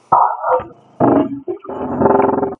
Monster Growl
I was messing around with some voice effects and somehow ended up with this growl. It sounds like a noise a dinosaur or dragon would make. Or like a clicker from "The Last of Us."
Also, if you slow it down, it sounds like wood creaking.
I'm excited to hear what you do with this sound if you do use it.